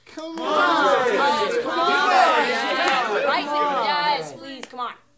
Small crowd pleading and encouraging
audience, crowd, studio, theater, theatre